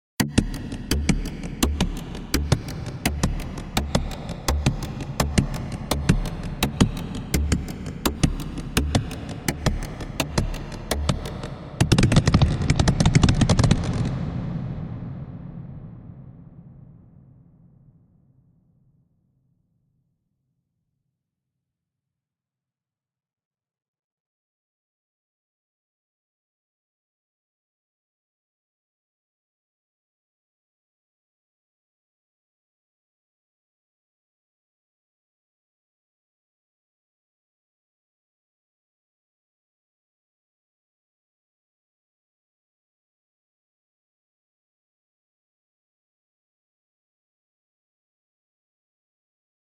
Wood percussion
percussion, percussive, wood